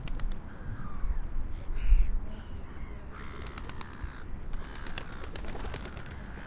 pigeons near water
animals, water, river